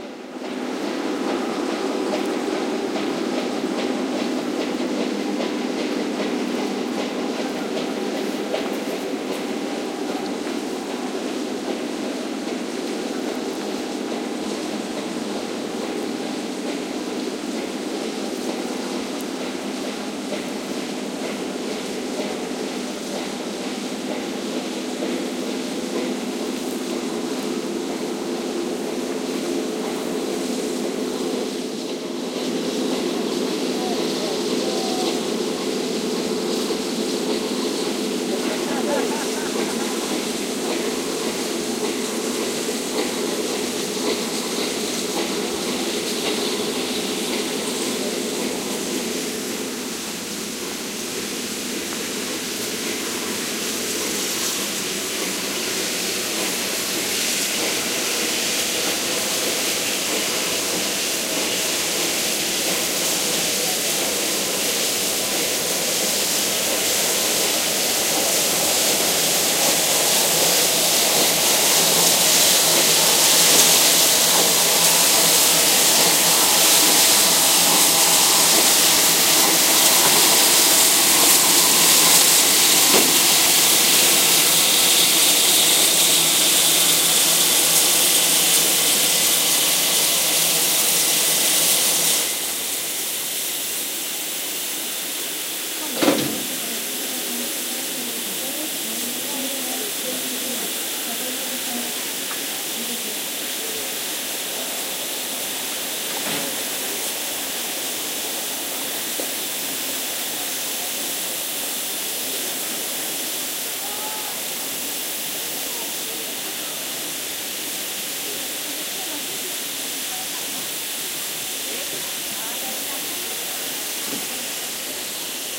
20160302 06.ushuaia.train
Tourist train arriving to station, some talk in background. Recorded near Tren del Fin del Mundo (Tierra de Fuego National Park, Argentina), using Soundman OKM capsules into FEL Microphone Amplifier BMA2, PCM-M10 recorder.
field-recording, railway, train, traffic, station